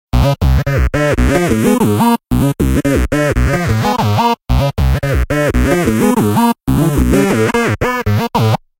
Wonderful World